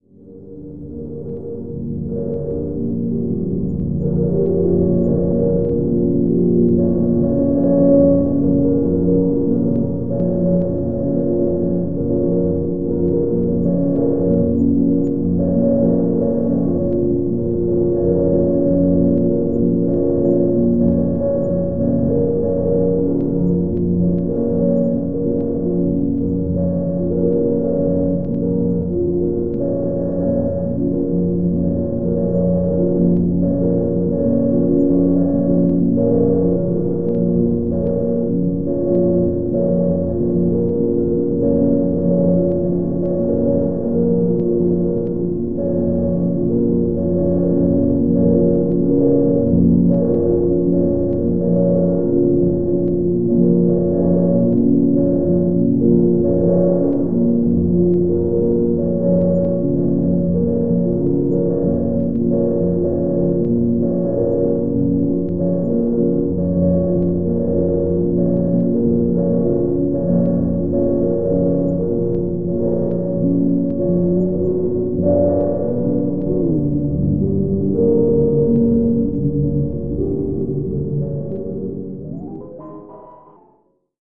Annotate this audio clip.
Sound squeezed, stretched and granulated into abstract shapes
abstract, ambient, drone, granular, noise